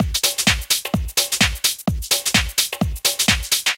Funky House 1 128
A drum loop in the style of funky house at 128 beats per minute.